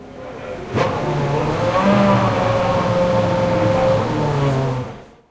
F1 BR 06 Engine Starts 5
Formula1 Brazil 2006 race. engine starts "MD MZR50" "Mic ECM907"